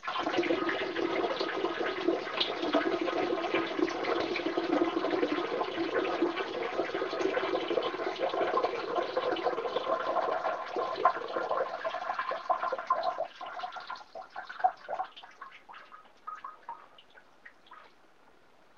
man urinating 2
man urinating in toilet. recorded with low-fi digital voice recorder
bathroom, urinating